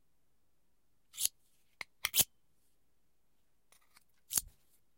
sharpening a knife